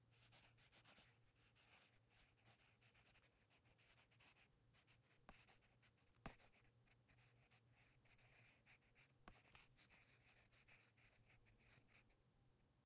Writing with a pencil on a piece of paper.